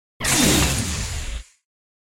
Laser Sword Turn On 2
Sci-fi laser sword sound effects that I created.
To record the hissing sound that I added in the sound effect, I ran the bottom of a cooking pan under some water, and then placed it on a hot stove. The water hissed as soon as it hit the hot stove top, making the hissing sound.
Hope you enjoy the sound effects!
futuristic, starwars, sword, lightsaber, energy, laser, weapon, sci-fi